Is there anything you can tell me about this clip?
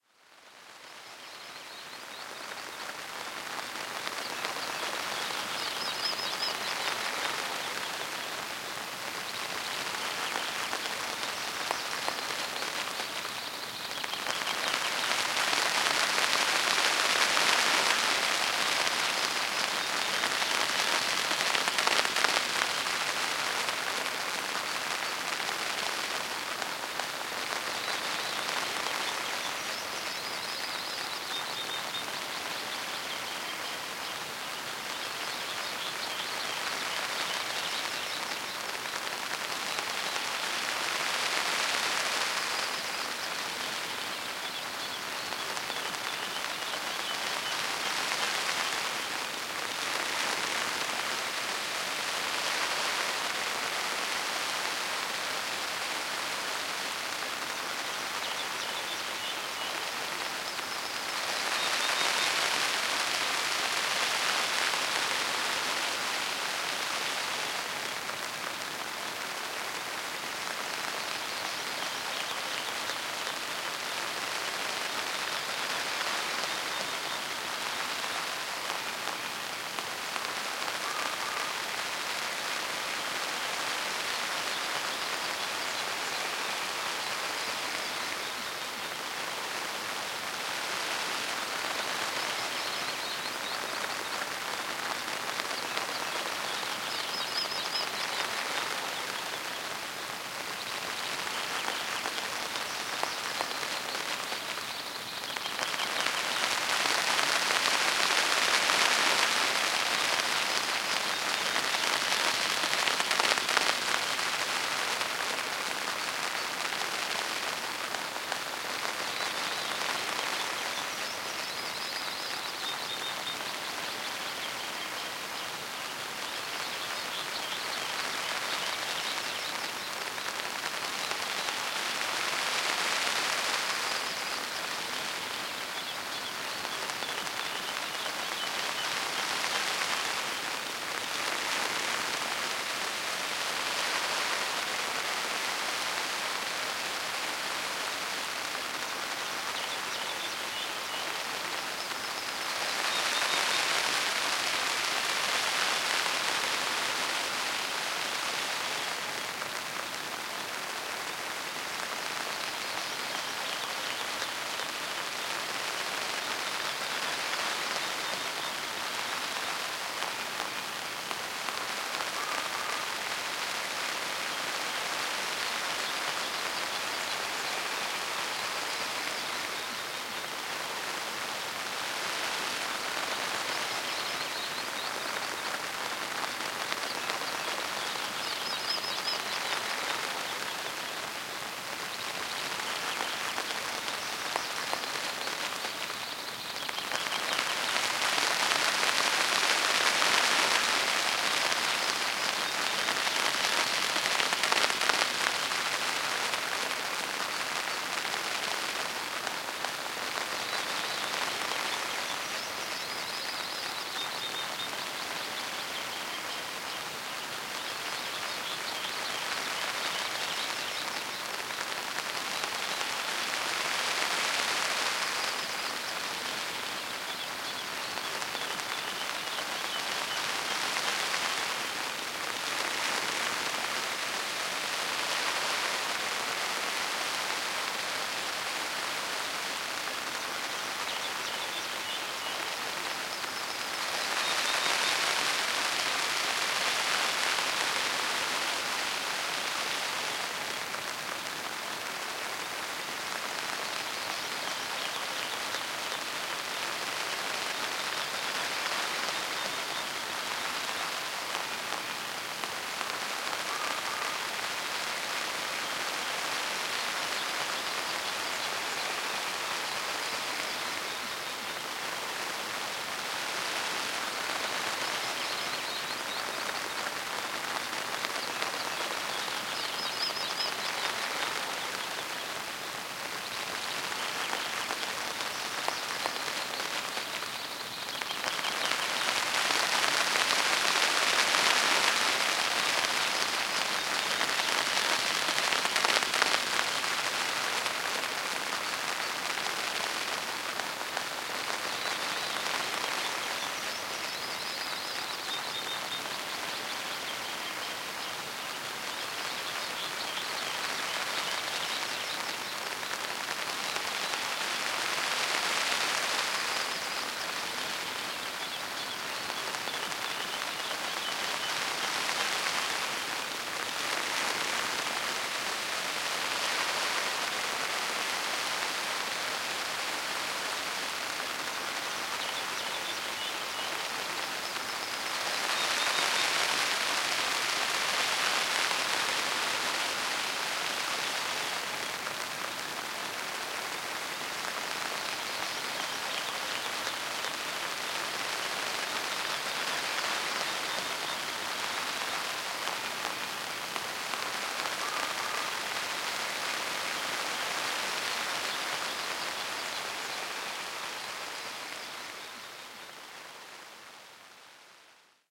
08.Rainy-Night-in-a-Tent
Recording of rain falling on the tent on a camp-site in Glen Nevis early in the morning.